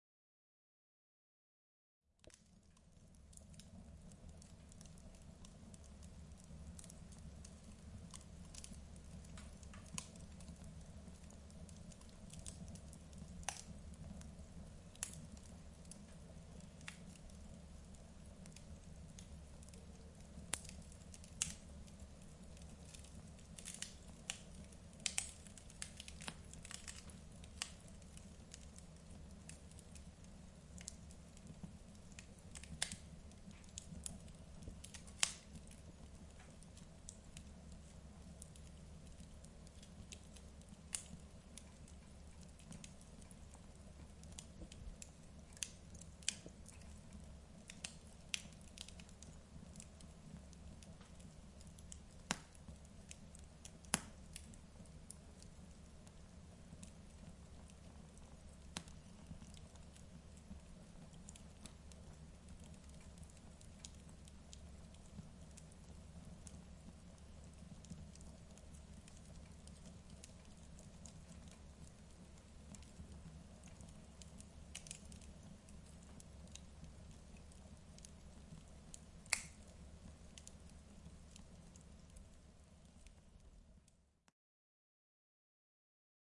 Fire in a stove.